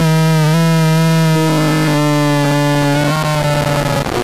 The Mute Synth can producce some unstable sounds.
Here is a mid pitch sound that starts OK but quickly breaks up.